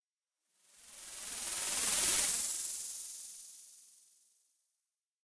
angry snake hiss pass long<CsG>
granular passby. Created using Alchemy synth
alchemy, digital, effect, passby, scifi, sounddesign, whoosh